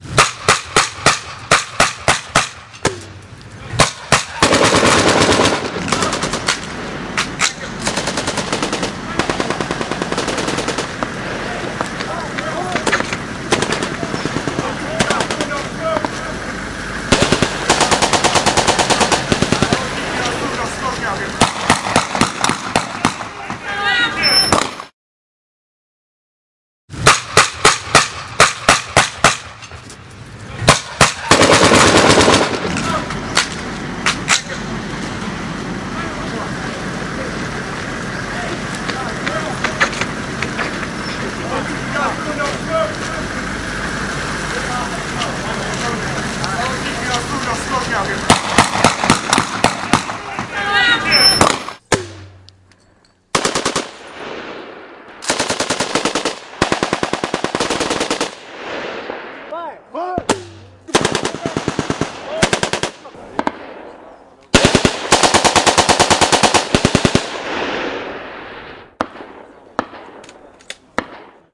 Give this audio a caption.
Mix of various war tracks, including the separate parts of battle training scenes at the end.